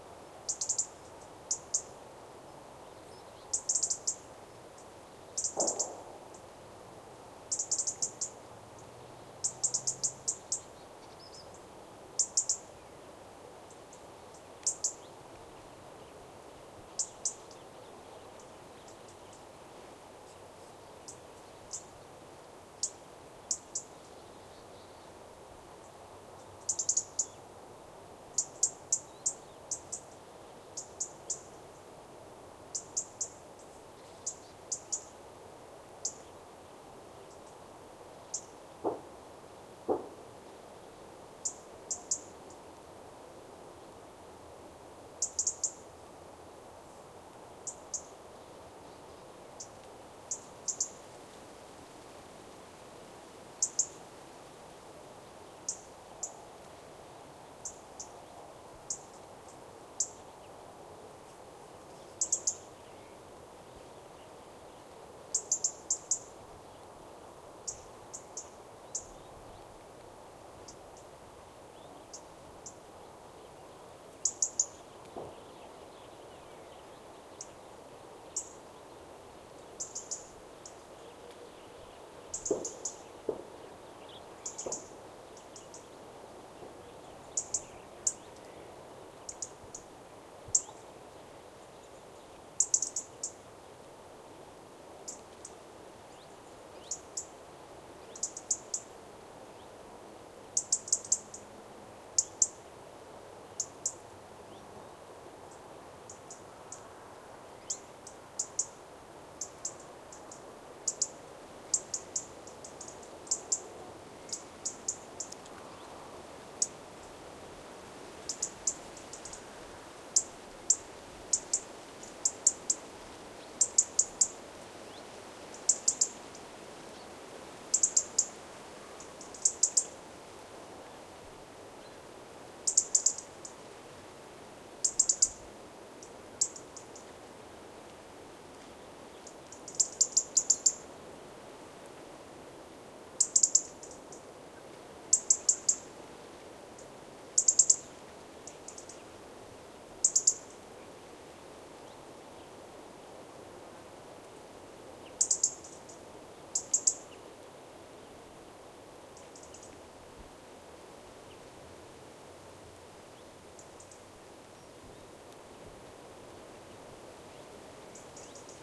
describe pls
Robins often come near you during winter, so I could record this one reasonably well. Other birds (and distant shots) in background